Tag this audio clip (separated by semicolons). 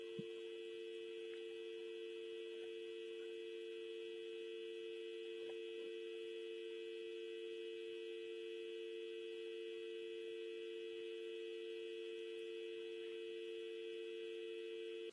a; Buzzing; phone; sound